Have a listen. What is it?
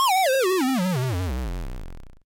Simple retro video game sound effects created using the amazing, free ChipTone tool.
For this pack I selected the LOSE generator as a starting point.
It's always nice to hear back from you.
What projects did you use these sounds for?
retro, 8-bit, lose, eightbit, computer, over, again, problem, video, loose, death, arcade, fail, end, chip, loser, failure, deadly, classic, electronic, hurt, game, damage, finish, raw, start, hit